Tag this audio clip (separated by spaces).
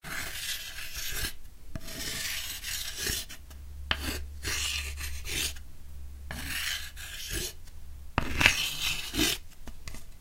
chalk blackboard crayon writing